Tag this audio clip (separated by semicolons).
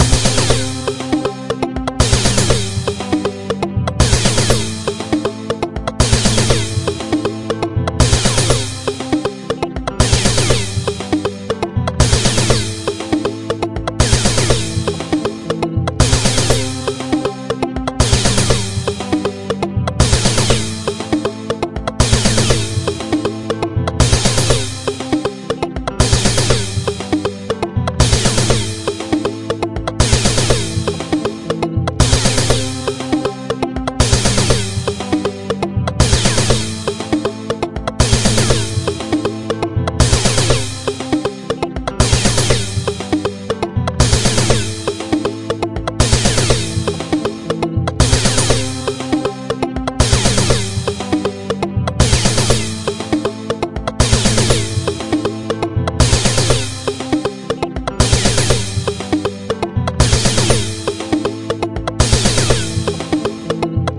bass; beat; loop